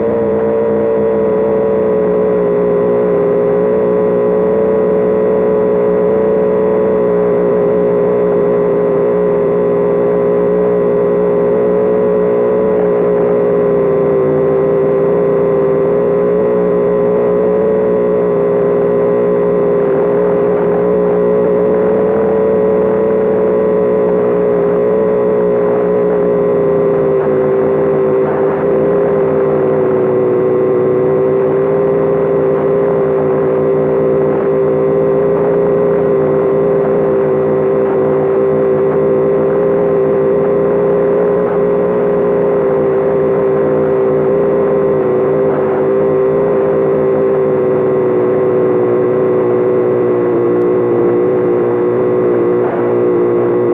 Various recordings of different data transmissions over shortwave or HF radio frequencies.